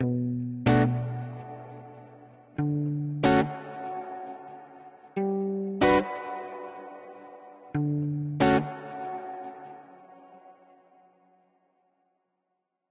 Upbeat Pop Guitar Surfy Vibe [93bpm] [D Major]
free, melodic, rock, beach, guitar, electric, upbeat, loop, pop, surf, rnb